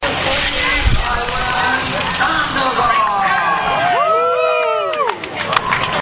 Player name announcement followed by cheer.